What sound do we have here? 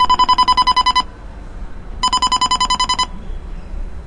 Sound of a crosswalk signal recorded near Ewah Women's University in Seoul South Korea. Later edited to loop and normalized.